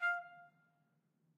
One-shot from Versilian Studios Chamber Orchestra 2: Community Edition sampling project.
Instrument family: Brass
Instrument: Trumpet
Articulation: staccato
Note: E#5
Midi note: 77
Midi velocity (center): 20
Room type: Large Auditorium
Microphone: 2x Rode NT1-A spaced pair, mixed close mics
Performer: Sam Hebert